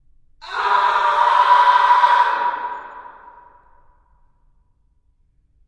Scream aaa louder-1
Out of the series of some weird screams made in the basement of the Utrecht School of The Arts, Hilversum, Netherlands. Made with Rode NT4 Stereo Mic + Zoom H4.
Vocal performance by Meskazy
anger, angry, darkness, death, disturbing, fear, funny, horror, pain, painfull, scream, screaming, weird, yell, yelling